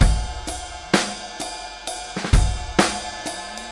trip hop-03
trip hop acoustic drum loop
acoustic, drum